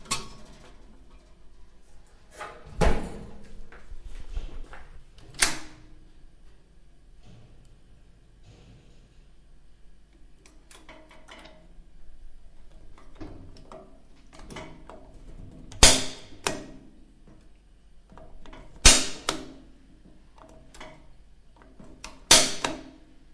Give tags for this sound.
gas; burning; flame; heater; Calentador

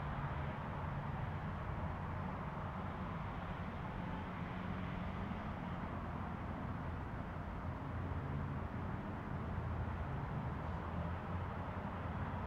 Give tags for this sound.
Cars; Distant; Highway; Ambient

